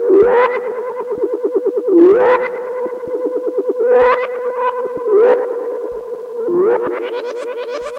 Weird FX Loop :: Internal Howling
This Internal Howling effect sound was created with a no-input-mixing-desk controlled and modulated feedback "noise".
electronic, effect, howling, F